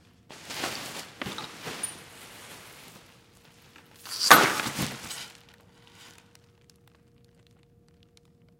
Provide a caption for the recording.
garbage bag (2)
Plastic garbage bag full of junk picked up and dropped near microphone.
Recorded with AKG condenser microphone M-Audio Delta AP